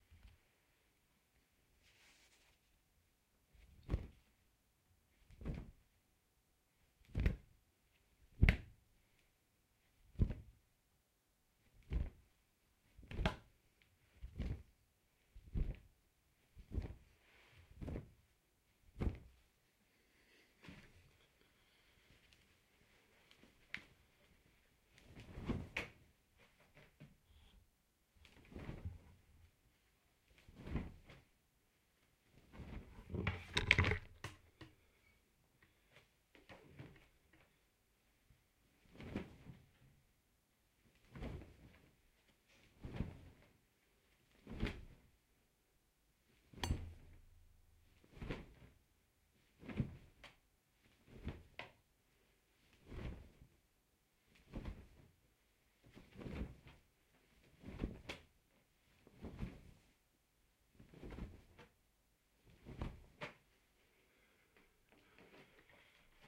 Flapping a fabric.